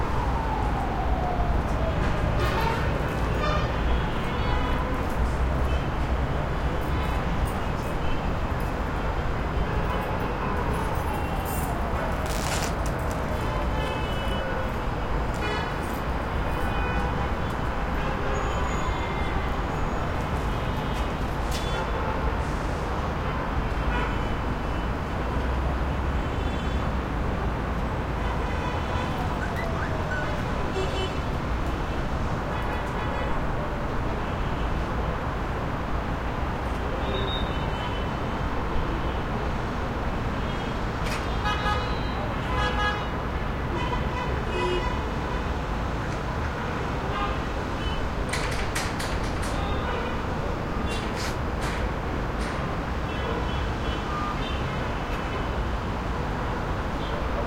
skyline Middle East distant traffic horn honks from bombed apartment staircase +keys jingle and sandy steps taps text ringtone Gaza 2016
East, honks, horn